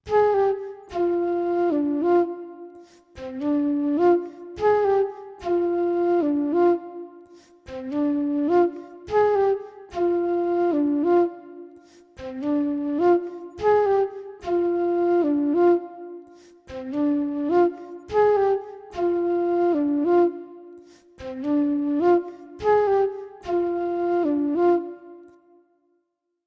Recorded this acoustic flute as part of a song I was writing.
flute, flute-acoustic, flute-music, music